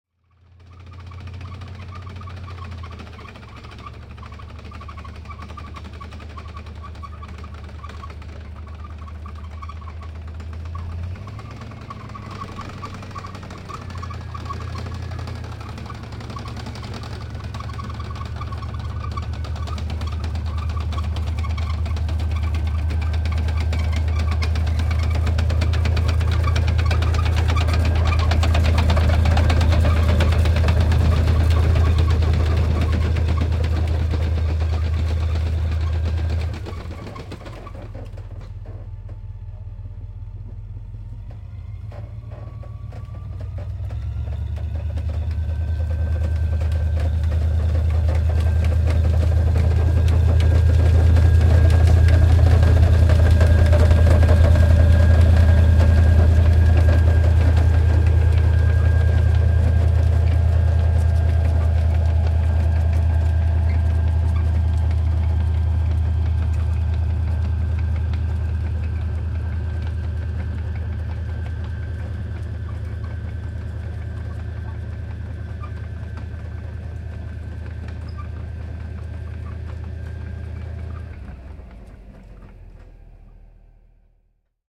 Panssarivaunu ohi / Tank passing by and reversing back on sand, tracks creaking
Panssarivaunu ajaa ohi ja peruuttaa takaisin hiekalla. Telaketjut kitisevät.
Paikka/Place: Tanska / Denmark / Naestved
Aika/Date: 06.10.1999
Field-recording, Finnish-Broadcasting-Company, Panssarivaunut, Soundfx, Tanks, Tehosteet, Telaketjut, Tracks, Yle, Yleisradio